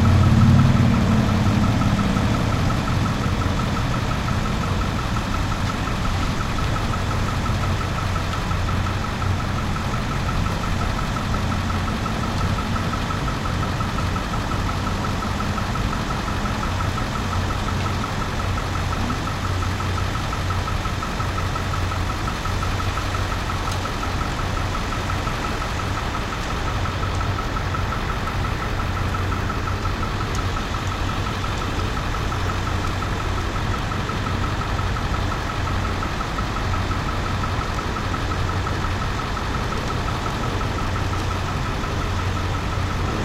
bed waiting for ferry
Recordings made while waiting for the Washington State Ferry and at various locations on board.